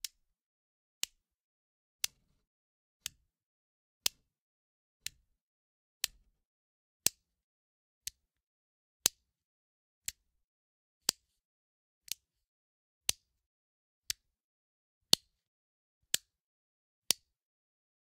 Variations of a bedside lamp switch. My first addition to my Buttons and Switches pack.
button, click, lamp, switch